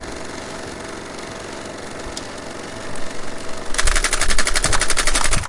01 - Turning off projector
Turning off a 16mm projector - Brand: Eiki
Apagado de proyector de 16mm - Marca: Eiki
16mm, field-recording, projector